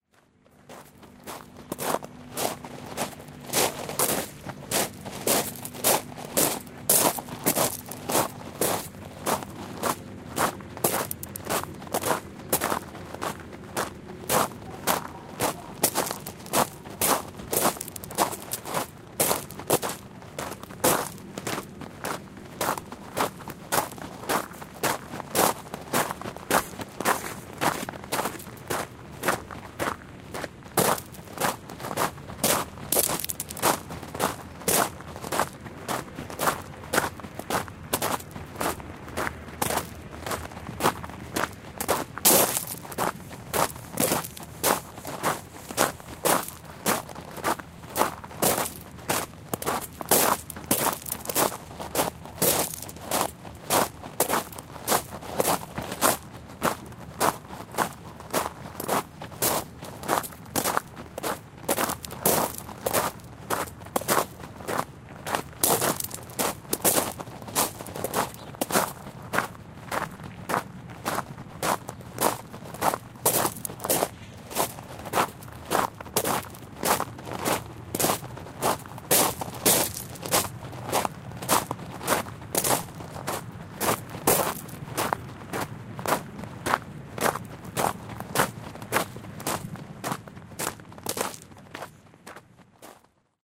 gravelpath KAV
Footsteps on gravel walking at KAV (Kandahar Air Field, Afghanistan)
Walking on the compound at KAV, everywhere is dust and gravel. After a couple of days my 8 year old Meindl (shoes) gave up...